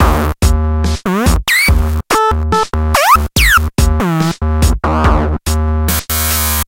A squeaky drum loop sequenced by two very bad mice.